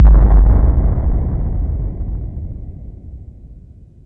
A Bomb sound.